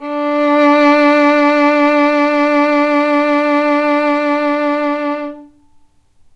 violin arco vibrato